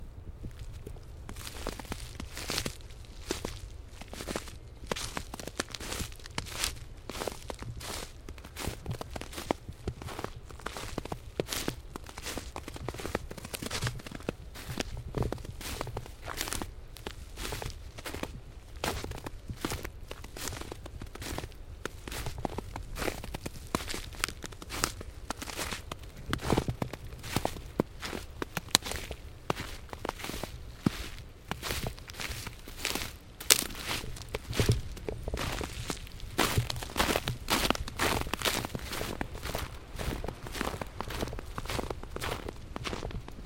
Walking on snow in woods Figuried
Recorded this on my Tascam Dr60D using my Rode NTG2 Shotgun mic. Just me walking through some snow we got yesterday